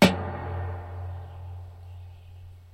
A single hit on a nearly empty 250 gallon propane tank.